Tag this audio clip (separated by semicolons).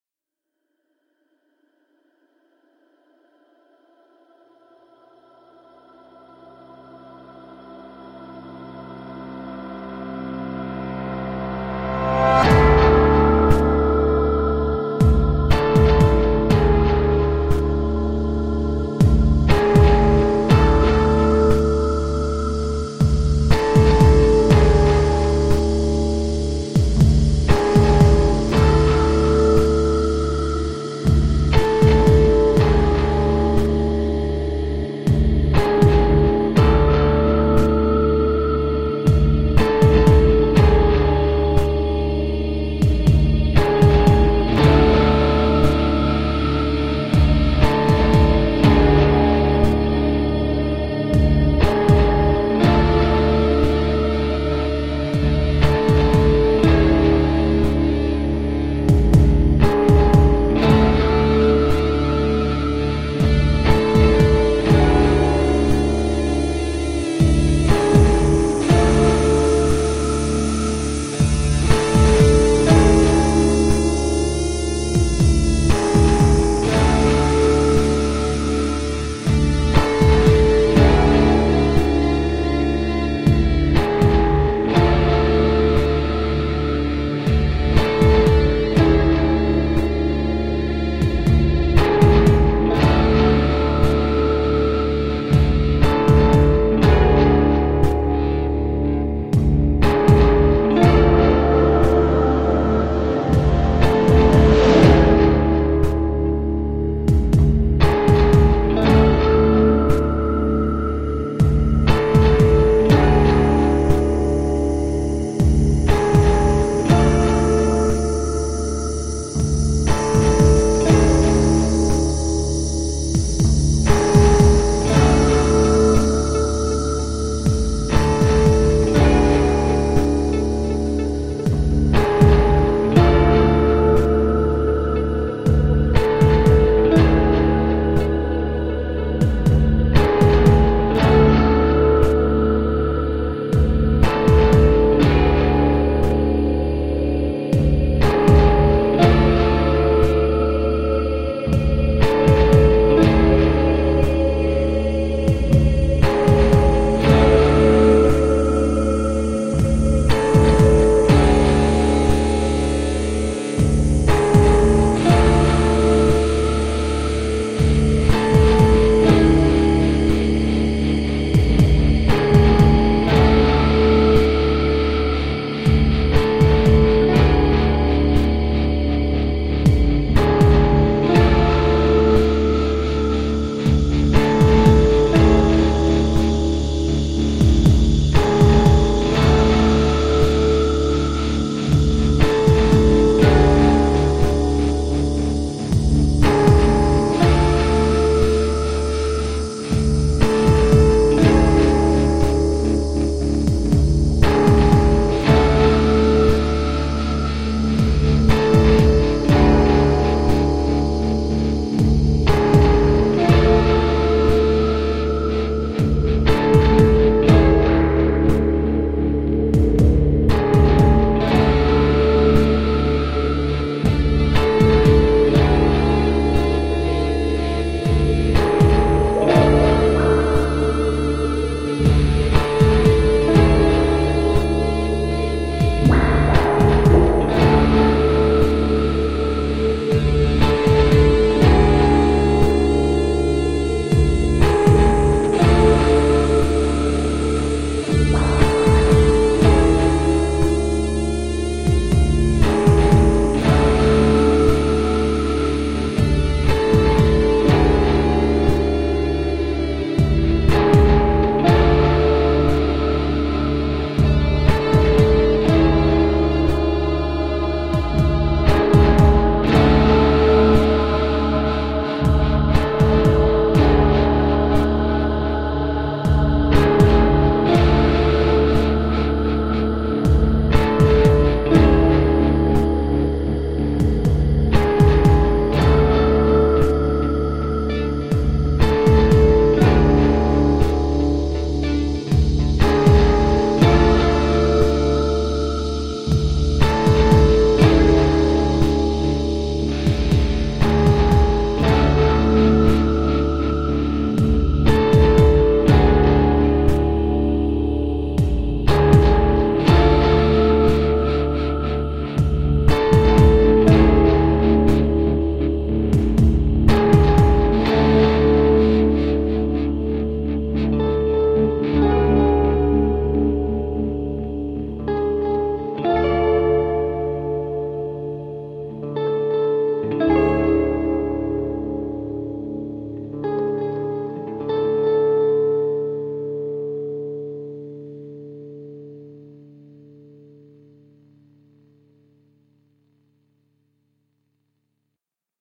ambient; artificial; dark; divine; dreamy; drone; evolving; experimental; film; freaky; horror; multisample; pad; reaktor; scary; smooth; soundscape; space; synth; wave